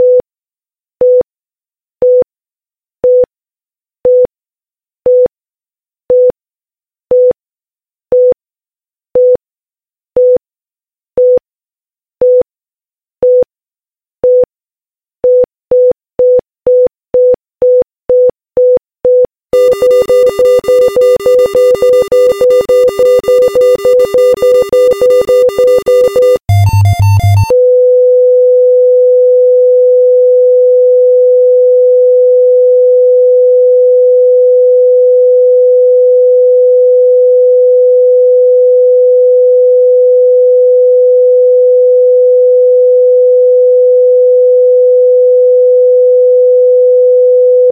Heart Rate - Normal, Increased Rate, Cardiac Arrest, Flatline
YOU MAY USE THIS IN A PROJECT FOR FREE, WITHOUT CREDITS
This sound will offer a realistic sound of a heart beeping on a hospital monitor.
THIS WAS MADE IN -AUDACITY-
cardiac, tone, arrest, alarm, clear, effect, attack, electronic, monitor, emergency, flatline, electric, fx, noise, increased, medical, heart, audacity, rate, hearbeat, dead, beep, hospital, heartrate, digital, normal